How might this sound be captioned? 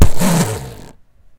My longboard wheel being spun quickly...needs some WD40
Attack, Bwow, Wheel